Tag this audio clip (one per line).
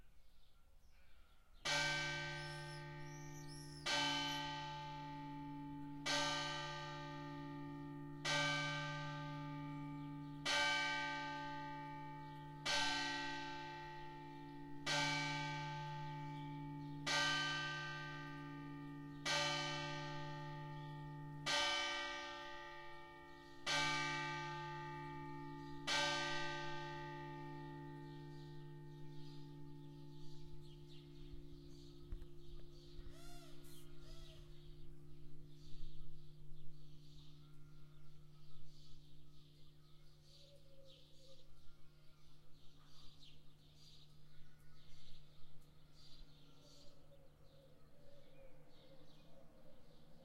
church
clanging
dome
dong
gong